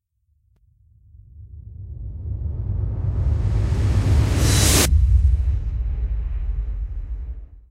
Buildup/Jumpscare/Vanish sound
A sound to use in a game
Made with Native Instrument's Rise And Fall plugin